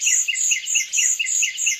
20110606.tweet.loop.03
looped bird tweet
siren, tweet, birds, loop, alarm, field-recording